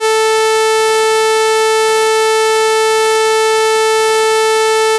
sawtooth waveform of frequency 440hertz and 5 seconds generated with Audacity